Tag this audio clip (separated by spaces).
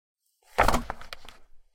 Flop Floor Book Throw